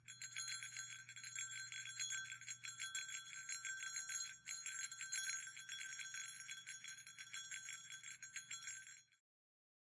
Single Jingle Bell quick
One jingle bell ringing
christmas, jingle-bell, single-jingle-bell